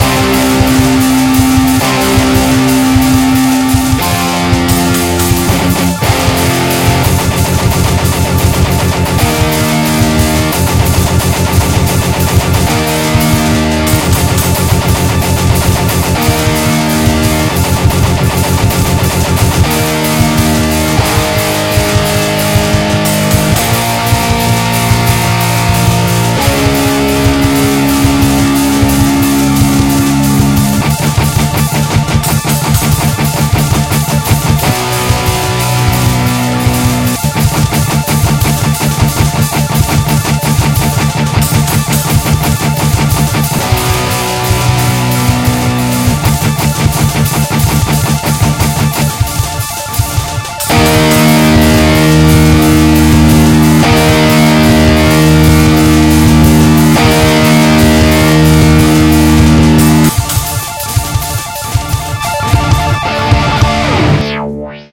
Metal Mix
A mix of sounds created by: ax-grinder, deathtomayo, and andreangelo edited by me with Audacity.